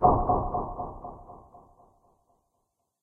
Another artifact, something have been closed or whateva...
decay, echo, reverb, smack